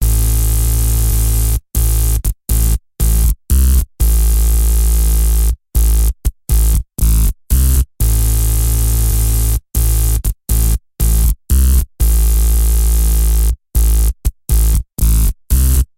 SCHMETTER BASS
dubstep, low, Wobbles, effect, sub, wobble, bass, edm, LFO, free-bass, Dub